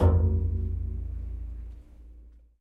Metal container 3 loud
A hit on a metal container
container, hit, loud, Metal